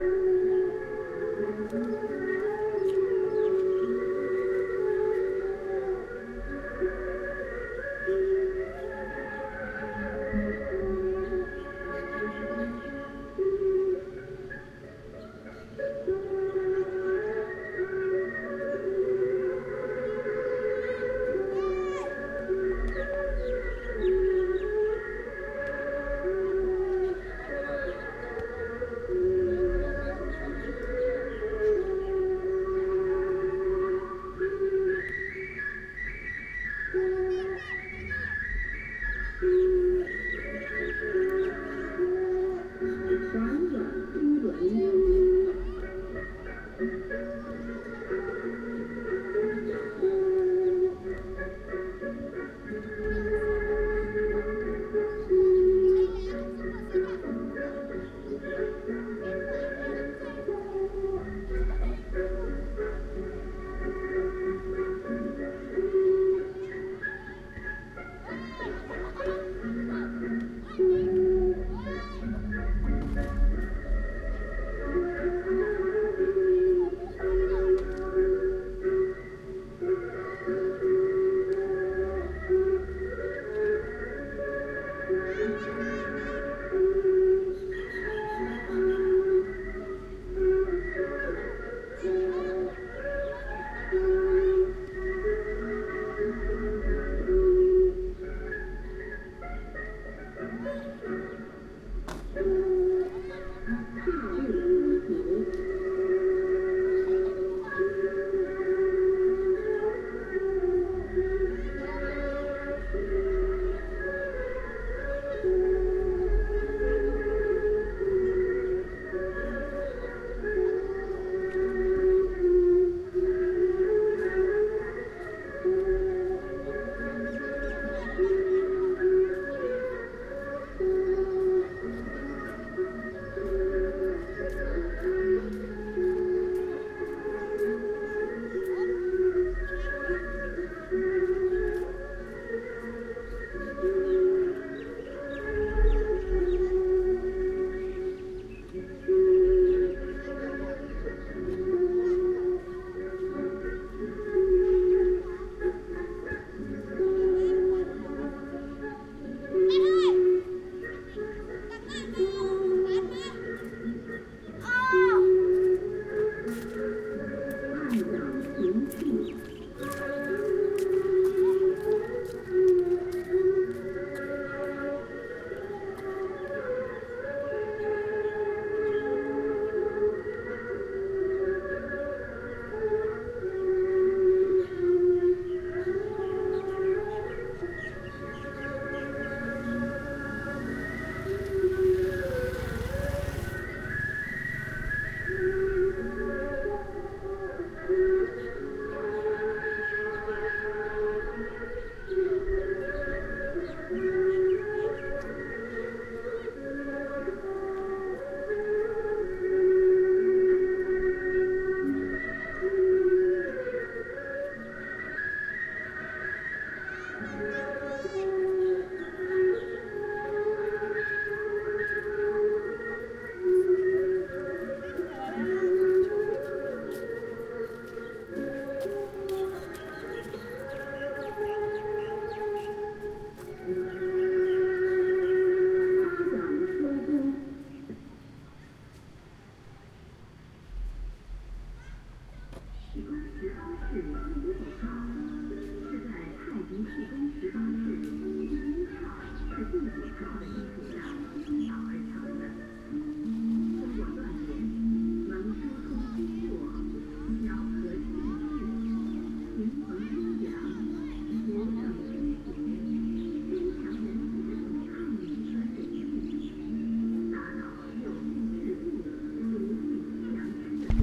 Mae Hong Son Park
I was awoken at 6am by this noise/music coming from the local park. There was some kind of fitness event going on that lasted til about midday, looping this over and over.
Thailand, Field-recording, Travel